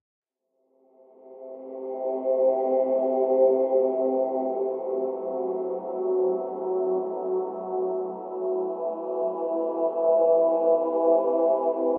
17 ca pad driftwood
driftwood space galaxy